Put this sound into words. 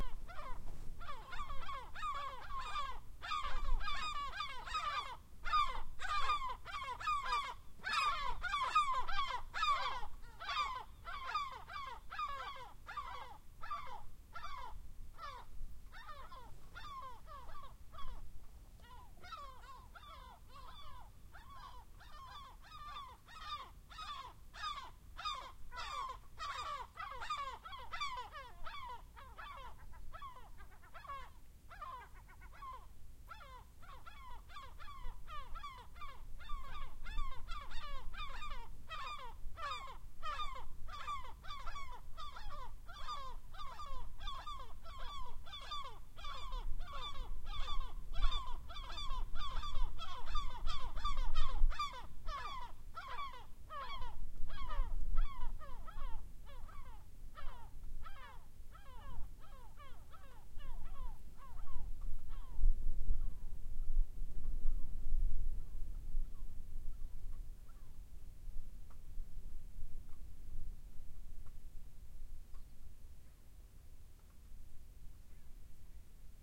Seagulls / gaviotas clean wildtrack
Clean recording of a bunch of seagulls.
seagull, seagulls, gaviotas, wildtrack, fx